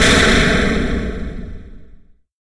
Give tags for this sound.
army artillery bomb boom destruction explosion explosive game games military video war

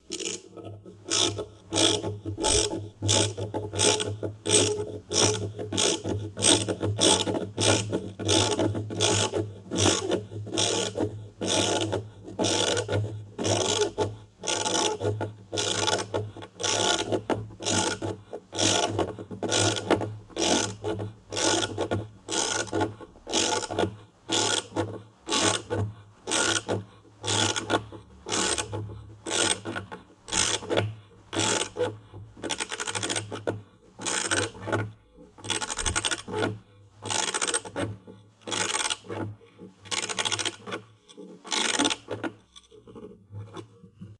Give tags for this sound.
tools mechanical metal movie-sound

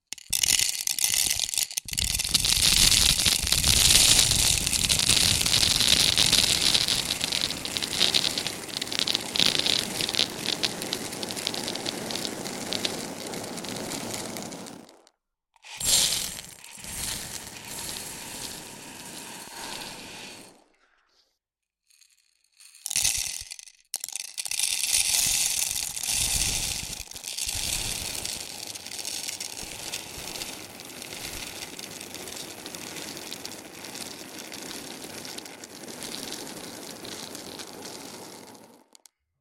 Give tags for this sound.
onesoundperday2018 pouring rice

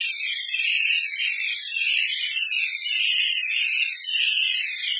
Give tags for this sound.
bird image seagull space synth

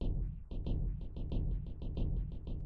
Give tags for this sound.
Dumpster Bang Audacity

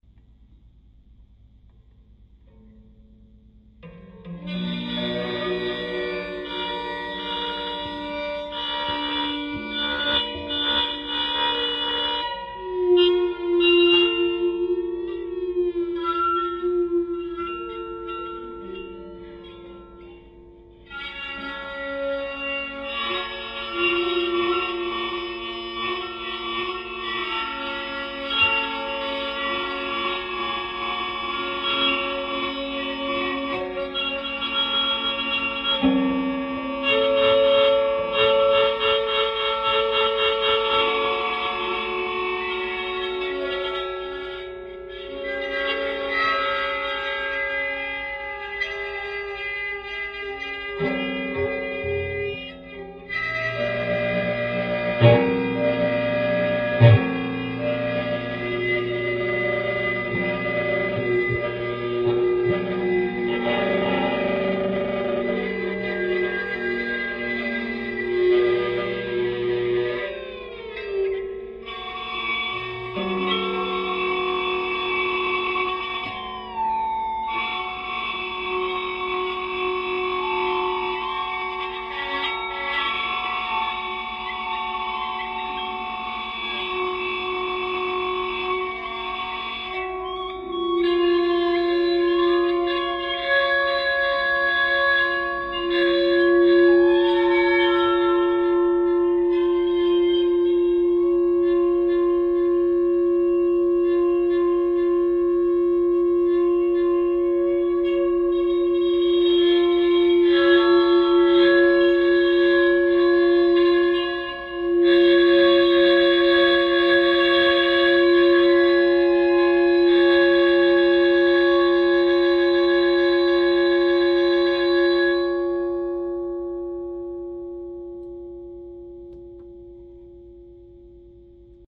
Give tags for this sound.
guitar lo-fi

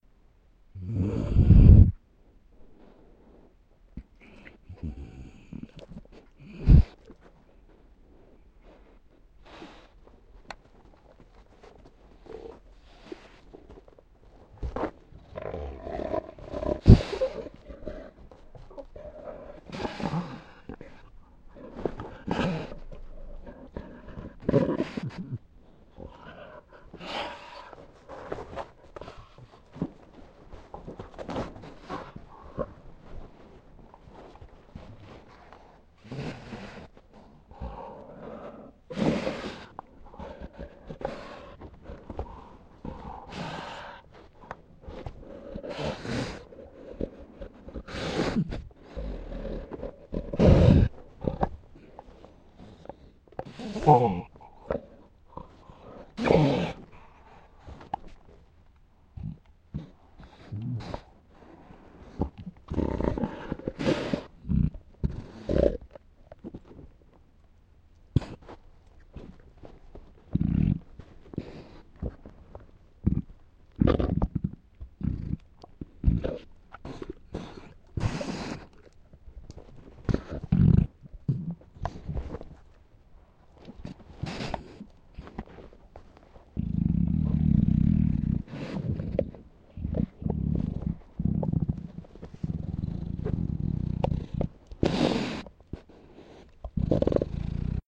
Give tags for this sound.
animal bulldog dog guttural slow

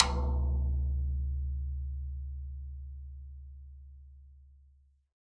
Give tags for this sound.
drum multisample tom

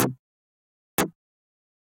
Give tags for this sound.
loop synth chord tech minimal

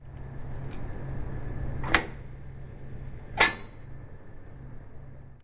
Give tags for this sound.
water fountain pedal liquid UPF-CS14 splash drink metallic Tallers water-fountain campus-upf

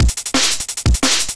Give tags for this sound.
break breakbeat dnb drum drum-and-bass drum-loop drums jungle loop

